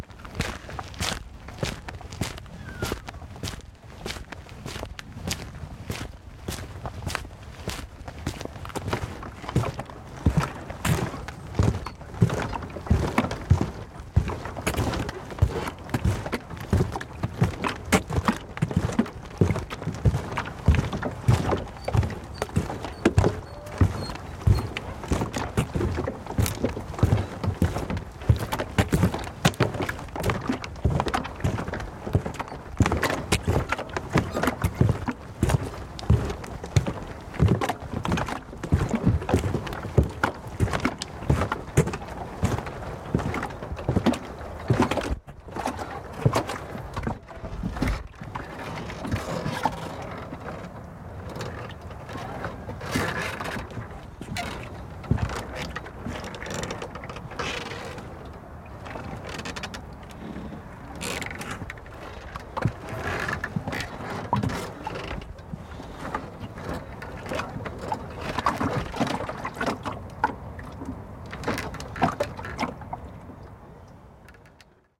Footsteps Walking Boot Gravel to Pontoon
A selection of short walking boot sounds. Recorded with a Sennheiser MKH416 Shotgun microphone.
outdoors, foley, walkingboots, footsteps, sfx, gravel, boots, pontoon, crunch, water